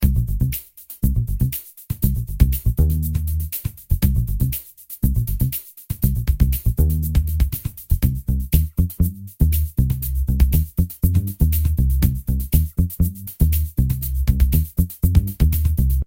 This Audio track was created with Apple Garageband back in 2013.
It was part of a game I made for my bachelors thesis.